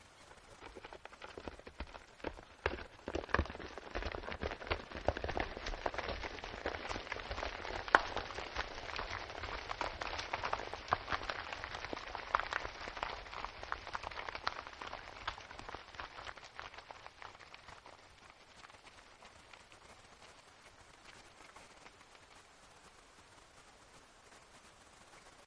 A small rock slide event occurs on Cathedral Mountain. Clear, separating pops and clicks are audible as the rocks cascade down the slope.